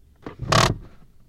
handbrake on
Handbrake being applied from inside car.